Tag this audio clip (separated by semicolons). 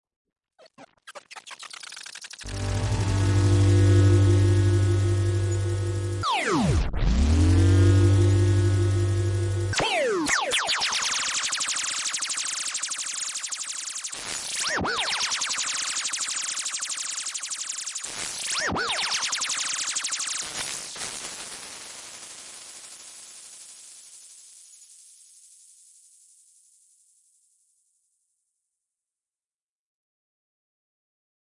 alien; damage; digital; effetc; electronic; experiment; impulse; laser; sci-fi; sfx; shooting; signal; sound-design; soundeffect; space; space-war